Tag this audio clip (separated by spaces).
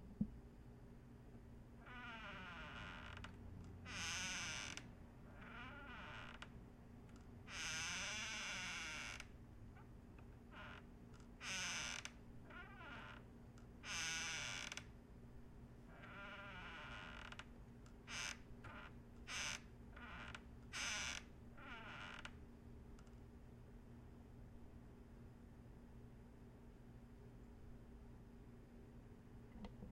door sequence squeaky